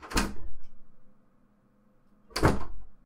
a sound of a door opening then closing. Use anywhere.